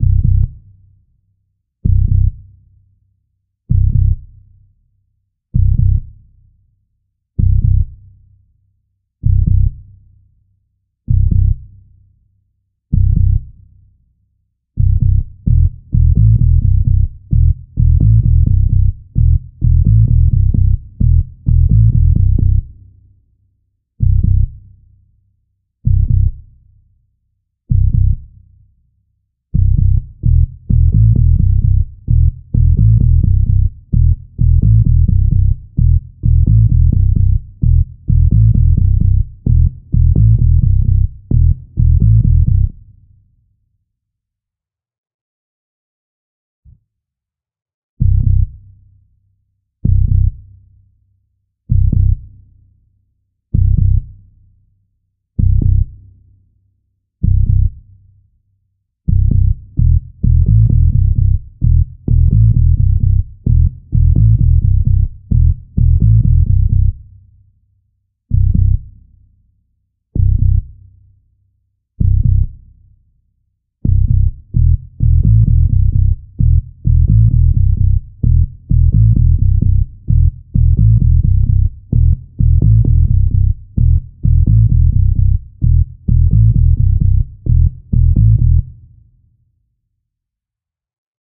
Spy Action Scene
Action, Fight, Sneak